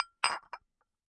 Two small Pyrex bowls tapped against each other. Dry, glassy sound, fairly quiet. Close miked with Rode NT-5s in X-Y configuration. Trimmed, DC removed, and normalized to -6 dB.
pyrex, tap